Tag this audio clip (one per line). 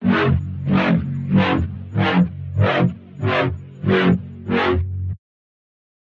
sounddesign
wobble